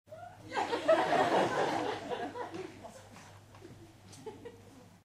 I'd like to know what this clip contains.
LaughLaugh in medium theatreRecorded with MD and Sony mic, above the people
auditorium, crowd, czech, prague, theatre